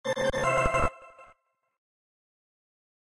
I used FL Studio 11 to create this effect, I filter the sound with Gross Beat plugins.
computer, digital, electric, fx, game, lo-fi, robotic, sound-design, sound-effect